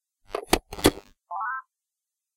This sound is produced by a phone-base when you put the phone on it.
phone-base, charge, campus-upf, phone, UPF-CS13